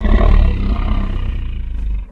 MONSTER GROWL
This is was made using a very short growl by dog made, pitched down and process layered.
animal, monster, creature, growl, beast